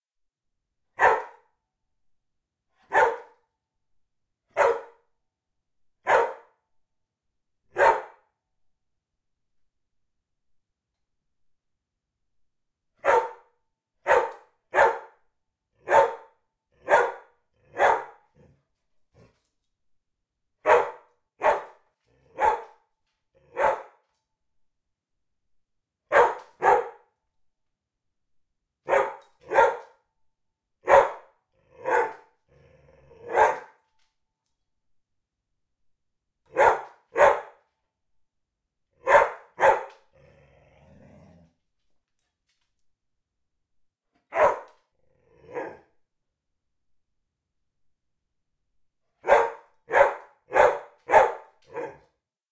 Dog Barking 2
A stereo recording of a dog barking. Indoor recording. Zoom H2 front on-board mics.
barking, barks, dog, stereo, woof, xy